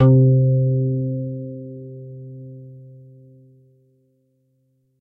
02-Electric Harp-C2
The sound is composed with four partials,each partial includes a number of different harmonics,similar to Additive Synthesis . I am using Yamaha Moxf 6 .
Harp, Plucked, Dulcimer, Electric-Harp